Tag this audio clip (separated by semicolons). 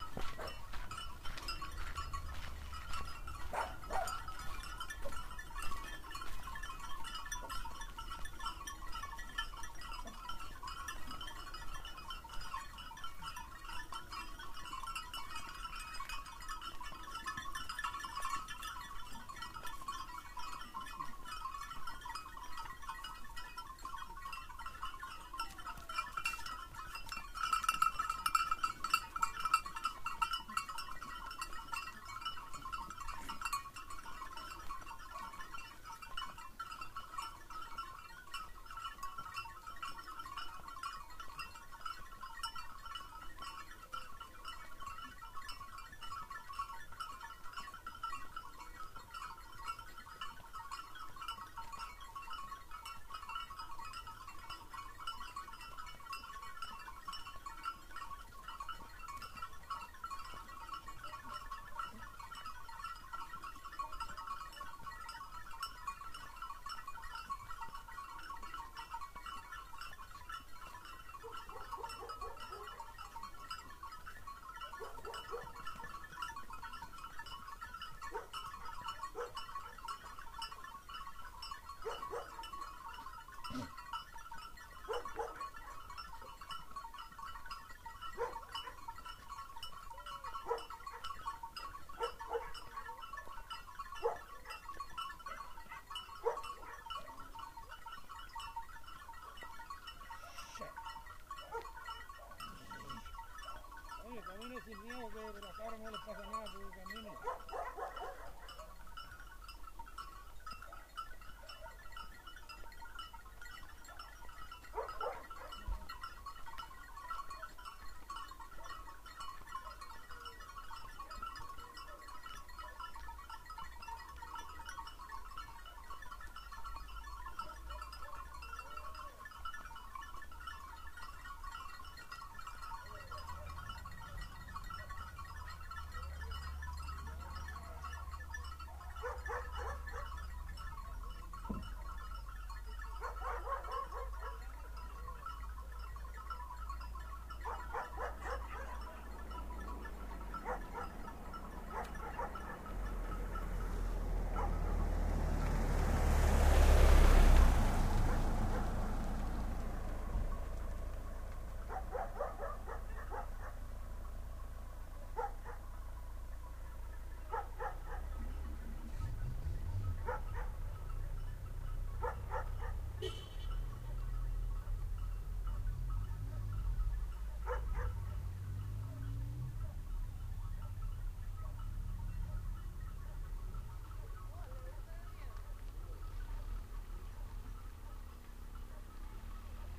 field-recording; goats